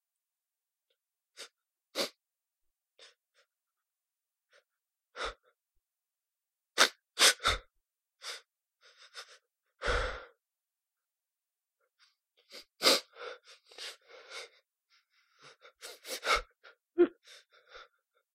The sound of a man who lost everything